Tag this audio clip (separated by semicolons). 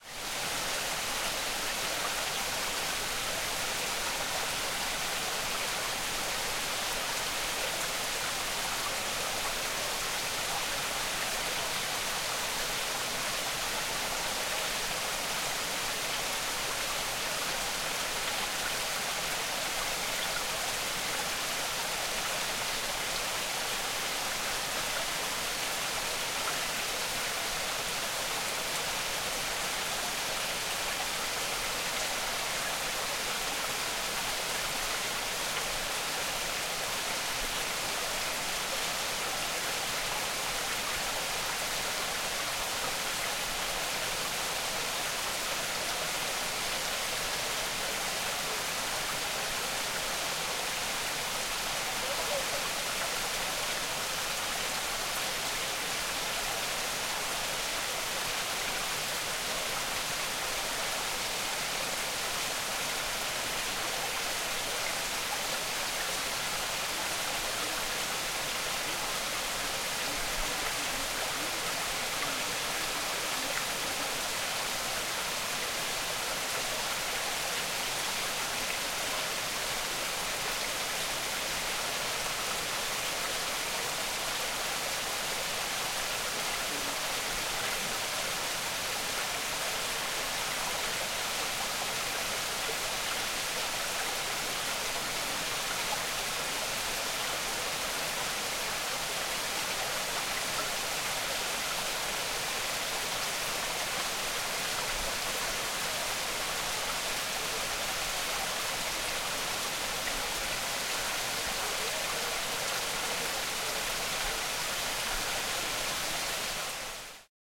Ambi; ambiance; exmoor; forrest; small; stereo; stream